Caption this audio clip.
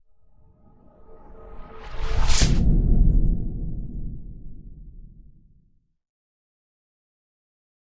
Trailer Hit 5
Industrial Sounds M/S Recording --> The recorded audio is processed in logic by using different FX like (reverse/reverb/delay/all kinds of phasing stuff)
Enjoy!
effects, hits, boom, fx, design, cinema, woosh, garage, sound, film, effect